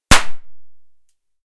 Sound of shooting with a 8mm Flobert pistol. Recorded in a wine-cellar using Rode NT4 -> custom-built Green preamp -> M-Audio MicroTrack. Unprocessed.
8mm, fire, flobert, pistol, shot, wine-cellar